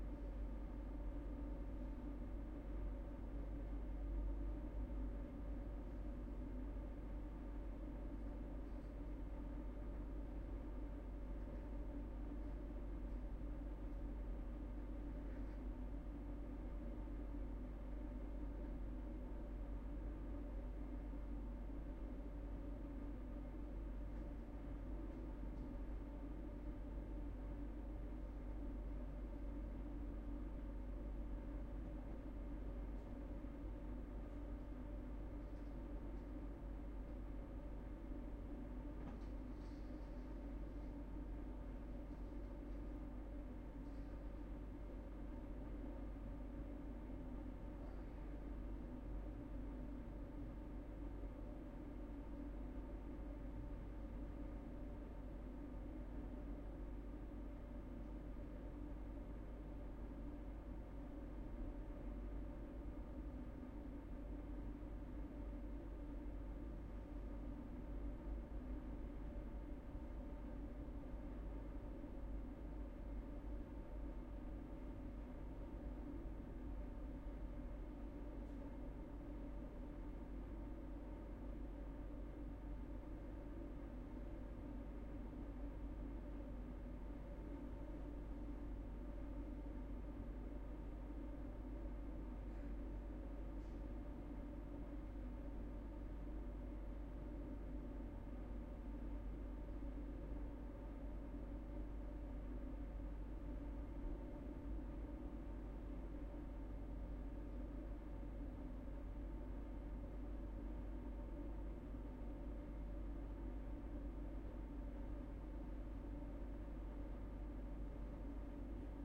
Ambience Hotel corridor MS 01
Recording from top floor clarion hotel oslo. Recording is in the corridor of the hotel and i have been useing sennheiser mkh 30 and mkh 50. To this recording there is a similar recording in with jecklin, useing bothe will creating a nice atmospher for surround ms in front and jecklin in rear.
ambient, atmosphere, room-tone, ambiance, roomtone, general-noise, room, field-recording